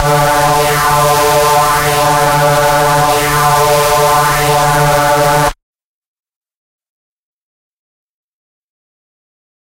multisampled Reese made with Massive+Cyanphase Vdist+various other stuff